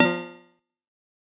GUI Sound Effects 008
GUI Sound Effects